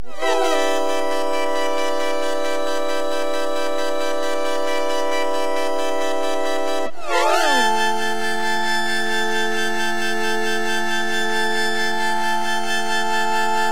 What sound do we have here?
Squaggly Pad Chords
A chord progression using a synth sound. Made with FL Studio.